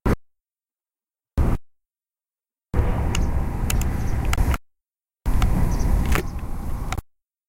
The sound of a birds singing. Recorded with a Zoom H1 recorder.
bird, birds, birdsong, Deltasona, field-recording, forest, nature